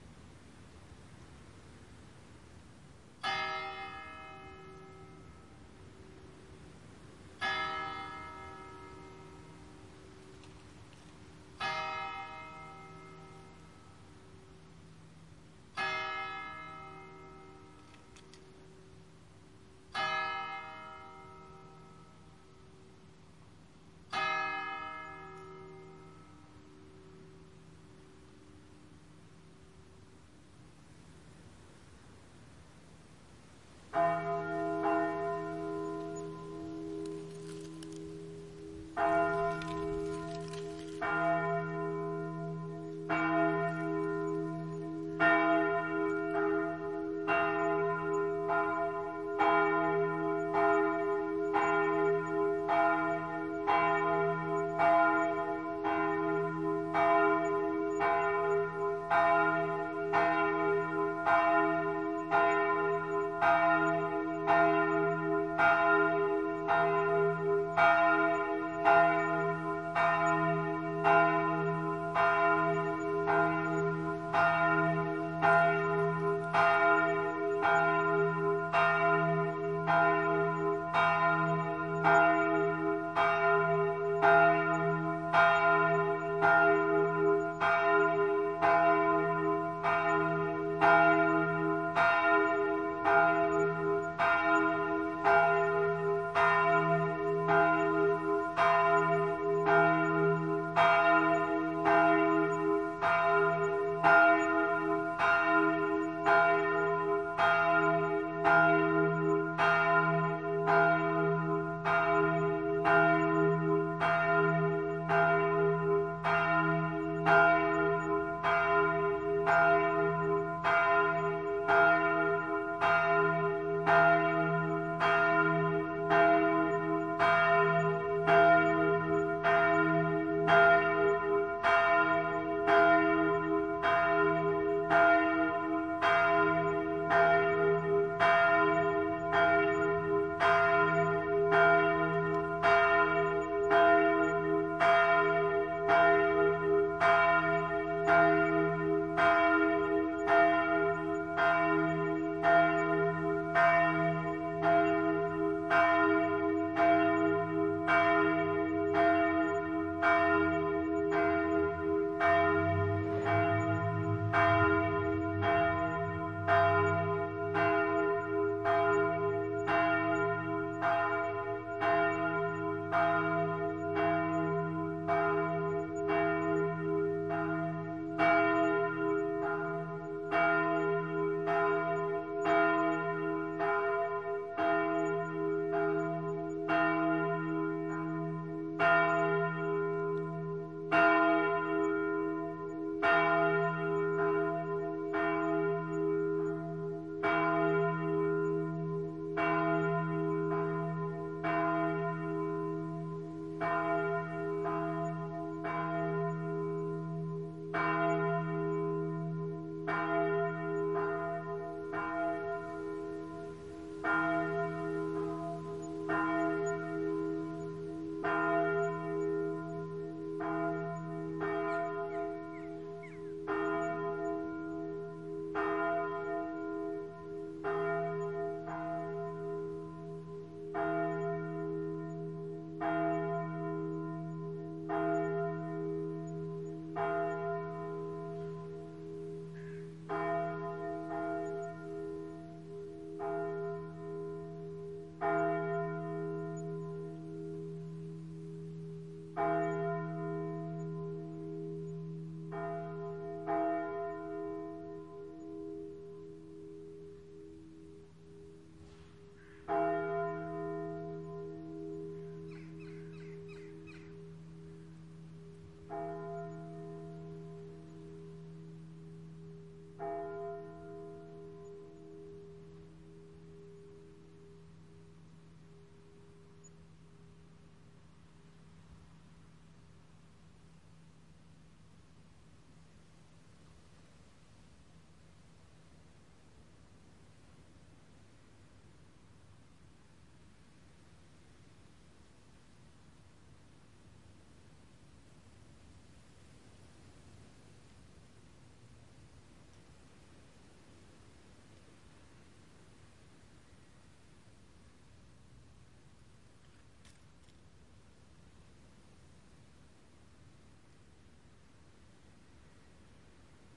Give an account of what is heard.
Six o' clock friday night in the small village of Spannum, northern province of Friesland in The Netherlands. Subsequently two types of bells. First one strikes six; second one is more of a convocation. Crackling in background are dry autumn leaves. Zoom H4N.
1800hrs, bells, church, dong, Friesland, Spannum, tower